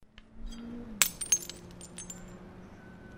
Glass Smash 8
One of the glass hits that I recorded on top of a hill in 2013.
I also uploaded this to the Steam Workshop: